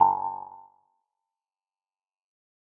Percussive Hit 02 02
This sound is part of a series and was originally a recorded finger snap.